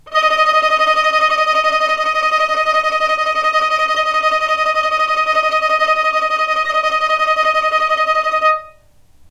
violin tremolo D#4
violin, tremolo